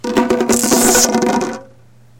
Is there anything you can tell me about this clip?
magnents bouncing on drums017
Sounds made by throwing to magnets together onto drums and in the air. Magnets thrown onto a tom tom, conga, djembe, bongos, and in to the air against themselves.
bouncing, maganent-noises, percussion